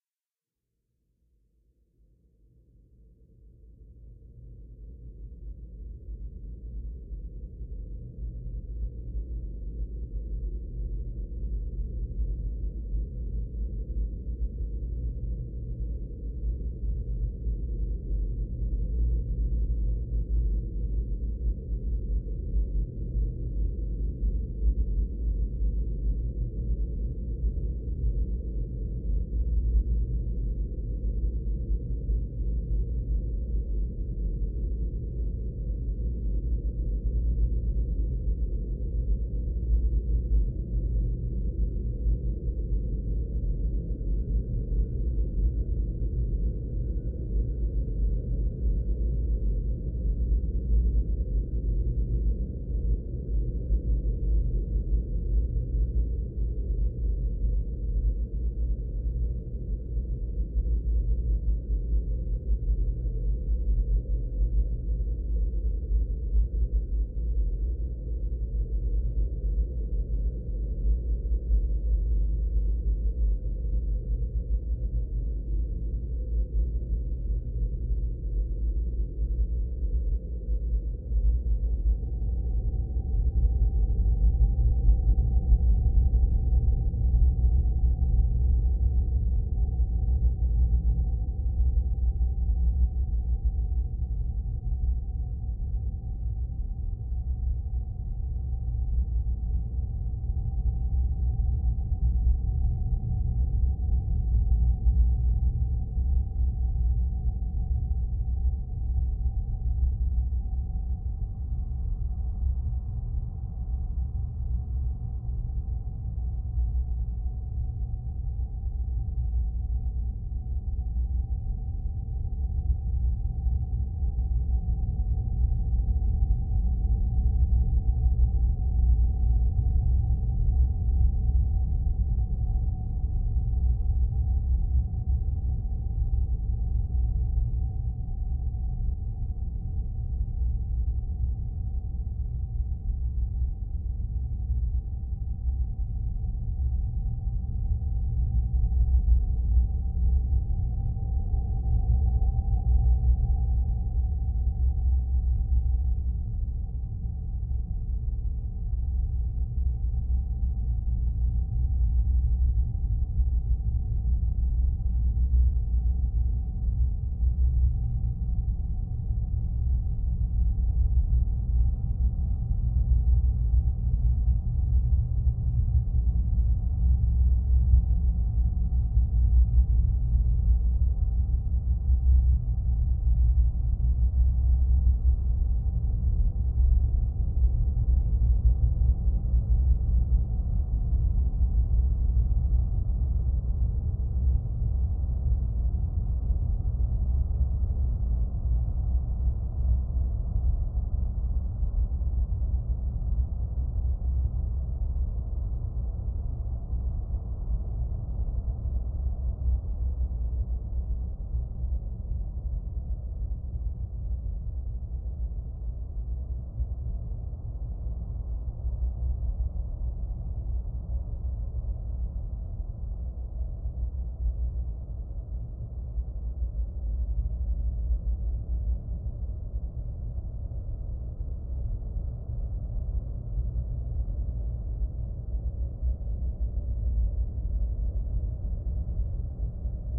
Dark low frequency cosmic drone